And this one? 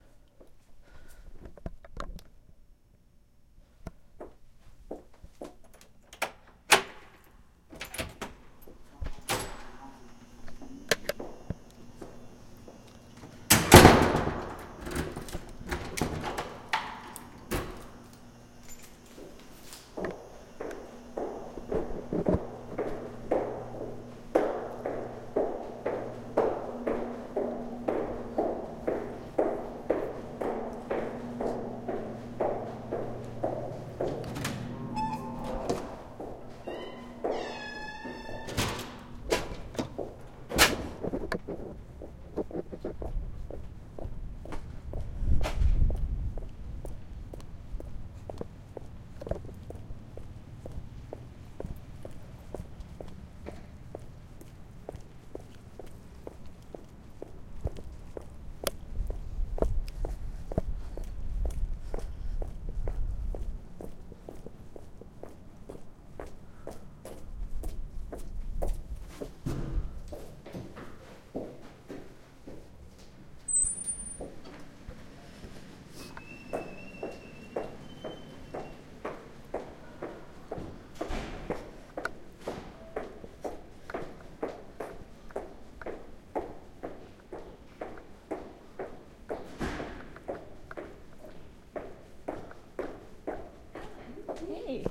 Field recording of myself walking in heels through several environments and doors.